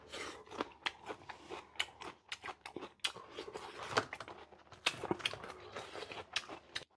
Ulsanbear eating chicken02
chicken, crispy, crunch, eat, eating, food, fried, meat